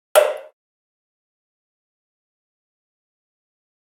Rim Shoot
Ambient sounds of Tecnocampus University.
RodeNT3, Tecnocampus, tfg, ZoomH4N